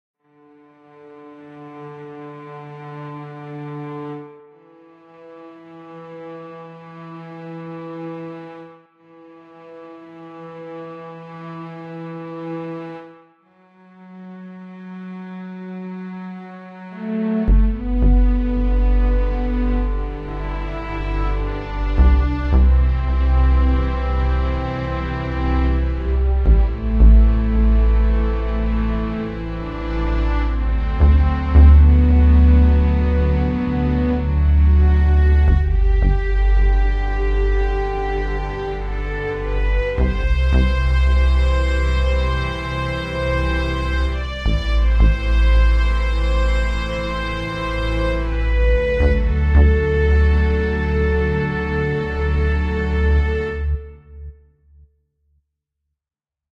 Autumn leaf with violins
cinema, cinematic, dramatic, film, finale, movie, orchestral, outro, sad, strings, trailer, viola, violin